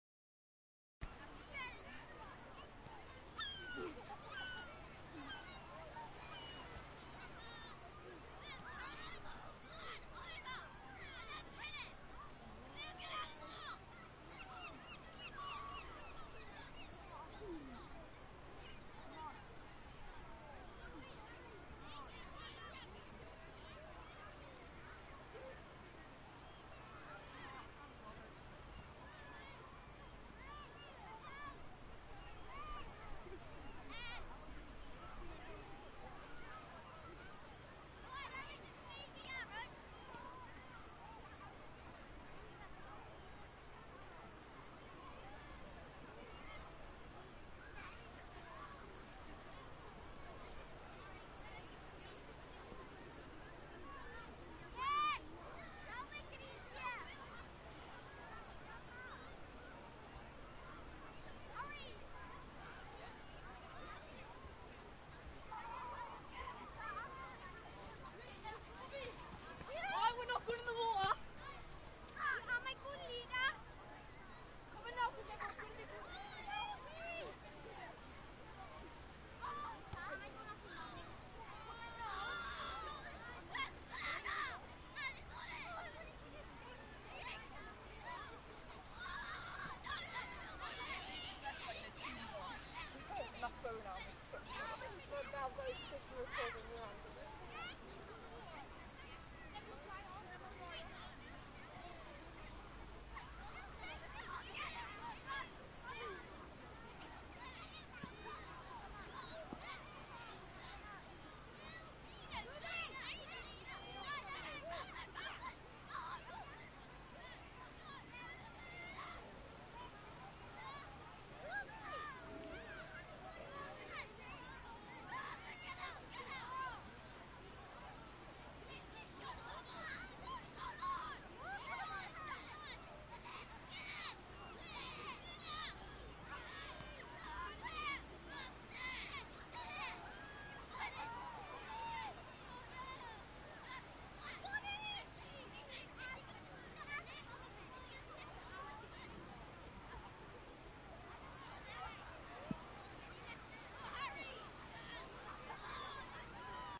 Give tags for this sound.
summer; sea; summertime; surf; ambient; seaside; wild; busy; water; beach; atmosphere; wildtrack; sand; atmos; ambience; coast; track; shore; waves; playing; children; ocean

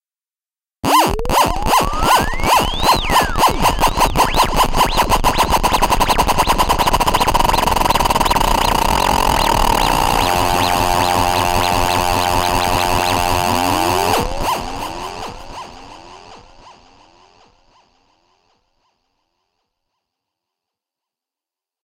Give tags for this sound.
broadcast; chord; deejay; dj; drop; dub-step; effect; electronic; fall; fx; imaging; instrument; instrumental; interlude; intro; jingle; loop; mix; music; noise; podcast; radio; radioplay; riser; send; sfx; slam; soundeffect; stereo; trailer

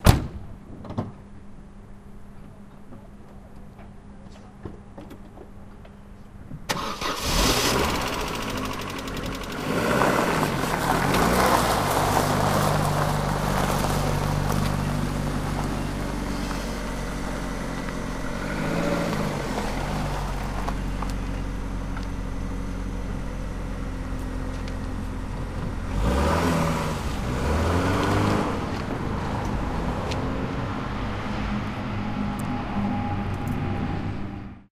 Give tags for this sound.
Opel; Vectra

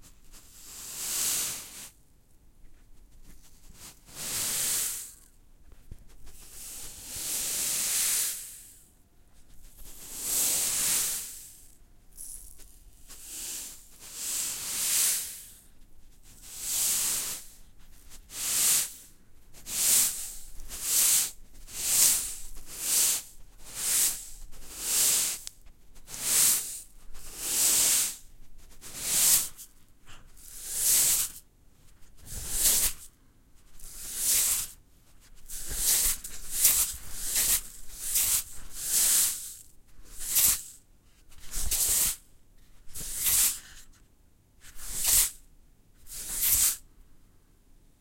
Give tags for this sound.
rain-maker; salt-shaker

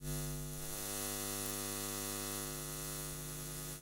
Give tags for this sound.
tesla; audio; shock; effects; amp; zapping; plug; sound; spark; fuse; arc; electricity; ark; electrical; electric; buzz; glitches; sparks; sparkling; voltage; zap; design; watt; socket; ninja; volt

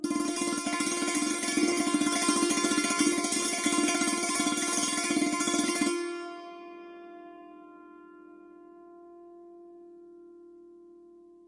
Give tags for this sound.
percussion; roll; pitched; acoustic; santoor

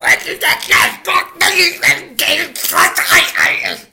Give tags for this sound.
aggressive; anger; angry; cursing; mad; madman; rage; stress; voice

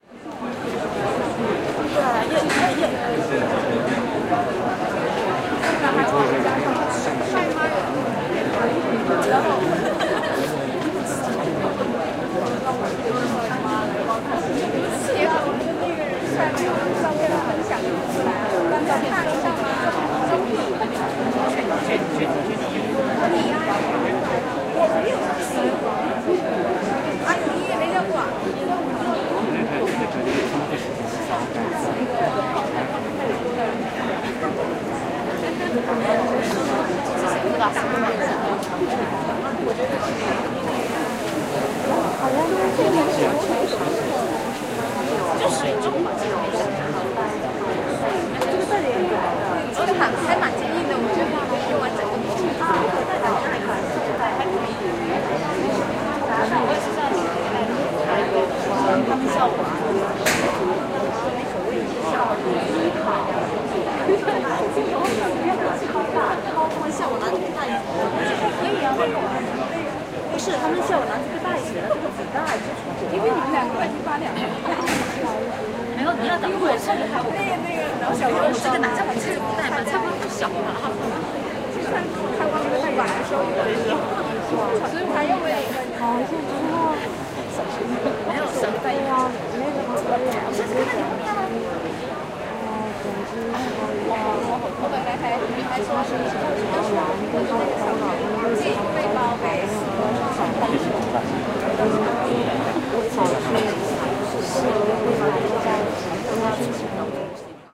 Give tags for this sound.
field-recording; noise; airport; travel; atmosphere; ambient; ambience; people; international; russian; moscow; russia; customs; sheremetyevo; people-talking; crowd

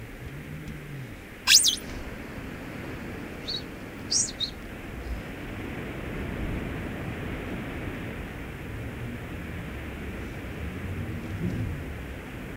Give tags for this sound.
gust; opening; rub; rubber; squeak; storm; surprise; weather; whistle; windy

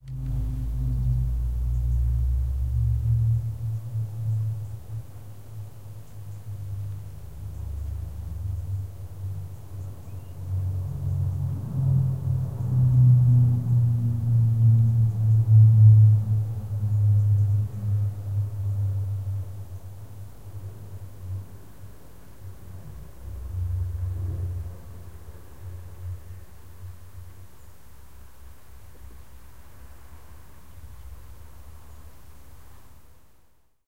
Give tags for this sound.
aircraft; c-130; field-recording; raf; stereo; wind; xy

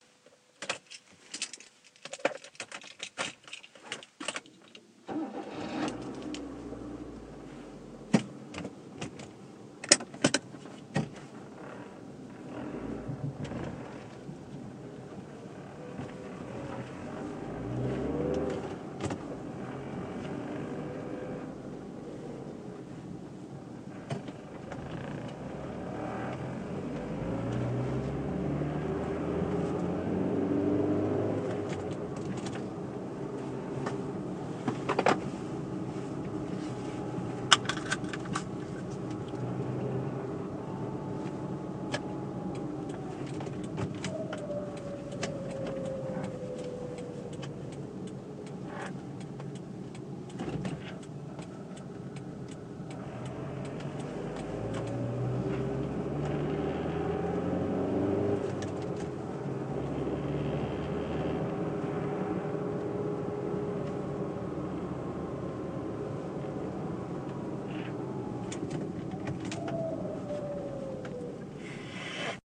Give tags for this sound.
driving; closing; inside; doors; car; start

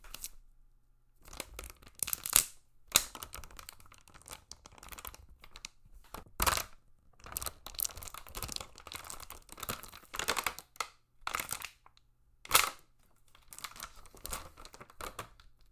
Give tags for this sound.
plastic; crunch; waterbottle

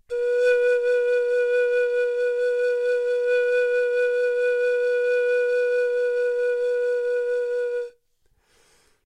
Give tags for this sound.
b1; pan

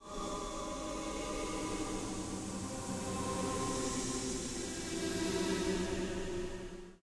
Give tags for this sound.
ghost; ghosts; ghouls; halloween; haunting; paranormal; spooky; wind

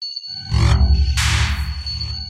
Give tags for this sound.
delay; filter; freq; high

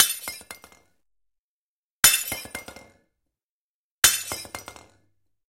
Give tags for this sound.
breaking; dropping; falling; floor; glass; glasses; ortf; xy

